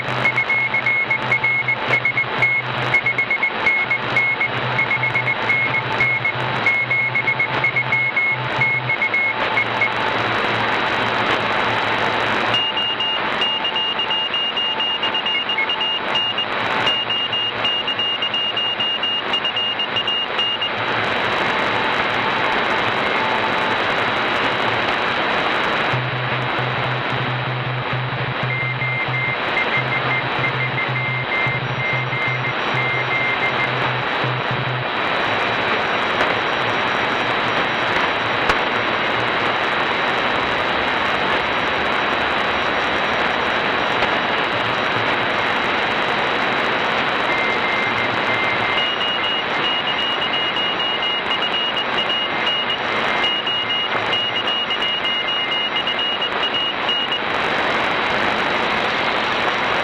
Several morse stations
code, dx, electronic, morse, shortwave, transmission